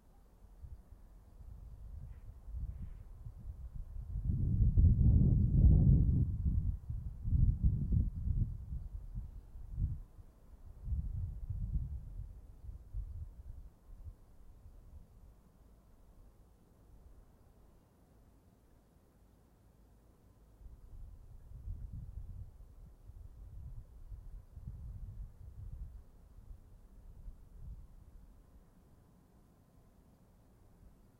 Gusts of Wind 1
Two gusts of wind, SM57